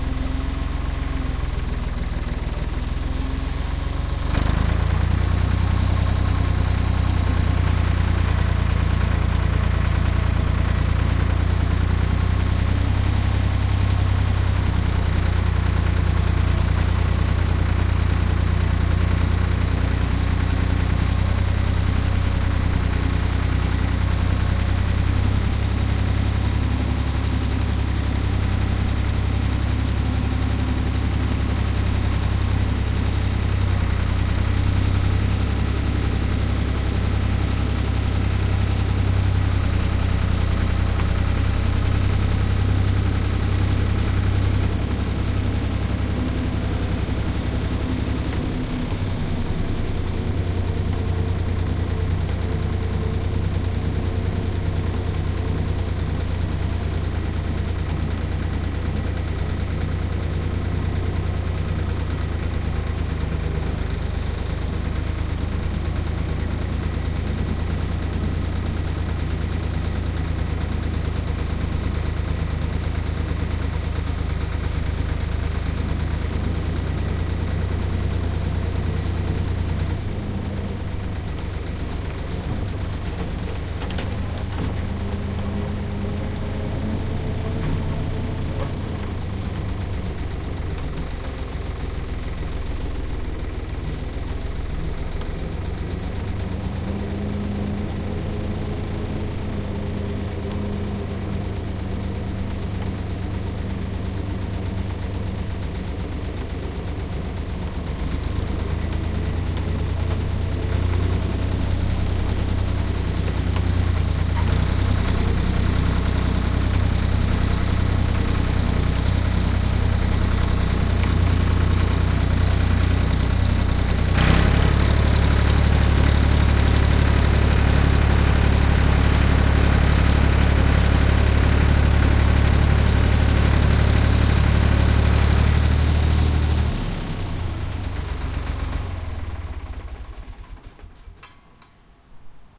FP Diesel Tractor Driving
Ford 4000 Diesel Tractor runs in cold weather.
farm, tractor, diesel, motor